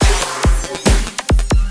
ATTACK loop 140 bpm-25
are all part of the "ATTACK LOOP 6" sample package and belong together
as they are all variations on the same 1 measure 4/4 140 bpm drumloop. The loop has a techno-trance
feel. The first four loops (00 till 03) contain some variations of the
pure drumloop, where 00 is the most minimal and 03 the fullest. All
other variations add other sound effects, some of them being sounds
with a certain pitch, mostly C. These loop are suitable for your trance
and techno productions. They were created using the Waldorf Attack VSTi within Cubase SX. Mastering (EQ, Stereo Enhancer, Multi-Band expand/compress/limit, dither, fades at start and/or end) done within Wavelab.
trance, drumloop, techno, 140-bpm